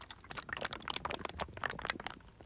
Water bubbling; close
Bubbling water settling
bubbles, liquid, water